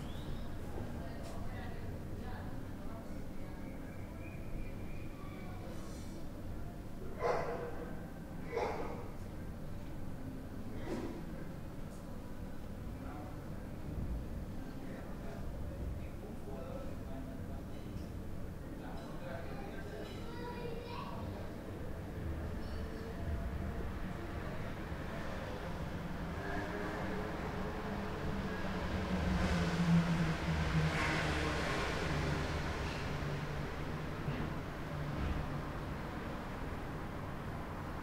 indoors house ambient living room tone distant neighbours and traffic
ambient, distant, house, indoors, living, neighbours, room, tone, traffic